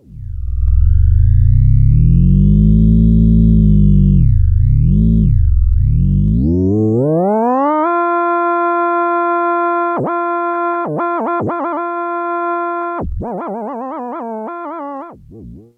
Virtual theremin sounds created with mousing freeware using the MIDI option and the GS wavetable synth in my PC recorded with Cooledit96. There was a limited range and it took some repeated attempts to get the sound to start. 4th voice option dry.
free, theremin